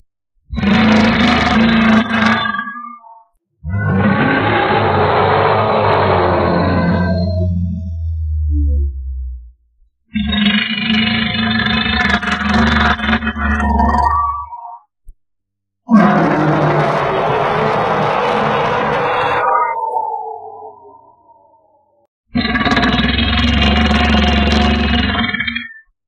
Noise Monster 7
I created this sounds with of burping and Rabid cries. I used exclusively the software "Adobe Audition CS6."
ambiance, monster